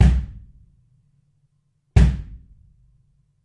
Gretsch Catalina 22 kick - REMO Fiberskyn reso head 5 inch hole muffed - 2 sounds
A Gretsch Catalina Maple 22 kick/bass drum with Evans EMAD batter head and REMO Fiberskyn reso head w 5 inch hole, muffed with a small towel.
Recorded using a SONY condenser mic and an iRiver H340.
catalina, head, fiberskyn, muffed, maple, 22, resonant, remo, inch, kick, hole, 5, gretsch